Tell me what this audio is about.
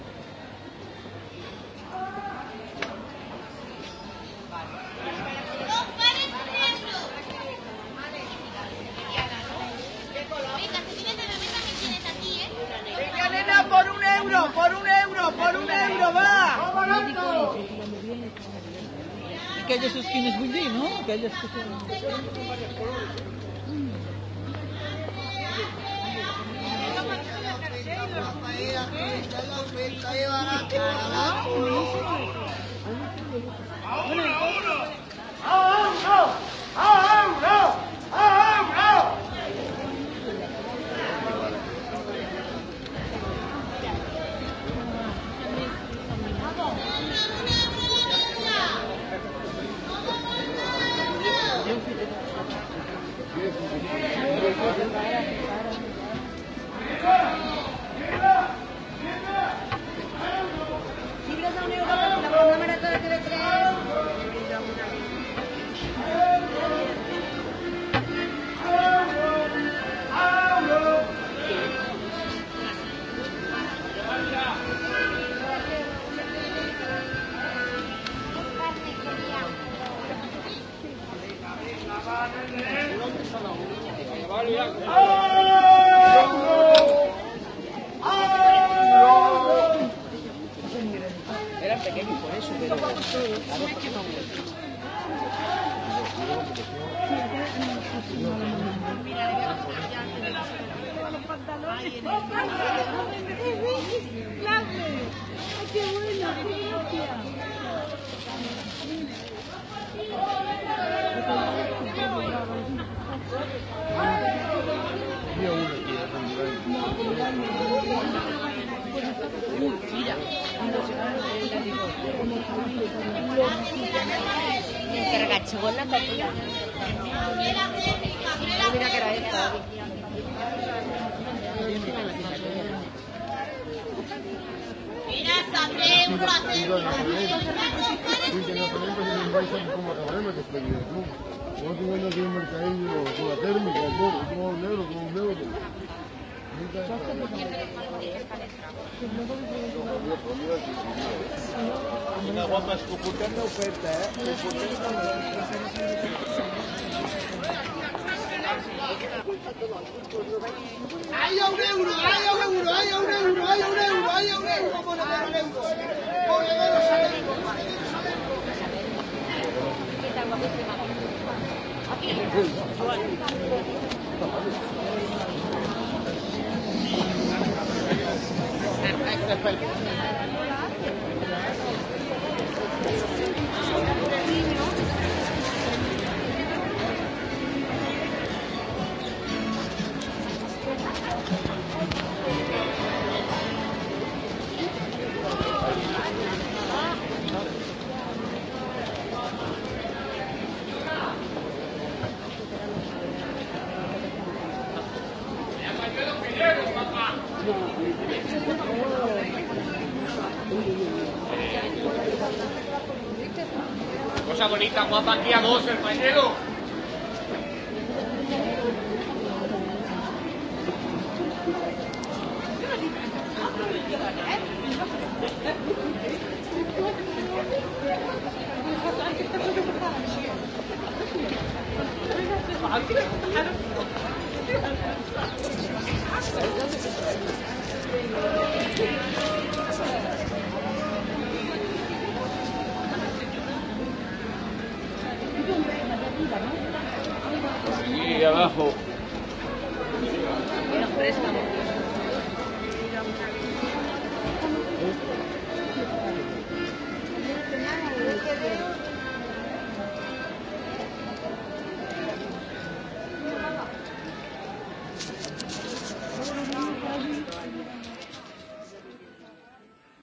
Granollers Market ambience.
Recorded on December 10, 2015.